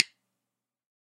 Drumsticks [Lutner 2BN (hickory)] №3.
The samples of these different types of hickory drumsticks was recorded with Reaper and M-Audio FireWire 410 sound card.
All these sounds was made with AKG D5 microphone.
1. Pro Mark L.A. Special DC hickory march drum sticks
2. Pro Mark L.A. Special hickory drum sticks 5 A;
3. Lutner Woodtip hickory drum sticks 7 B;
4. Pro Mark L.A. Special hickory drum sticks 5 B;
5. Lutner hickory drum sticks RockN;
6. Lutner hickory drum sticks 2 BN;
7. Pro Mark L.A. Special hickory drum sticks 5 BN;
8. Pro Mark L.A. Special hickory drum sticks 2BN;
9. Pro Mark L.A. Special hickory drum sticks 2B;
10. Lutner hickory drum sticks 5A.
sounds, recorded, types, drumsticks, these, sound, FireWire, card, different, 410, AKG, microphone, All, Reaper, The, picked-upped, samples, hickory, D5, M-Audio, by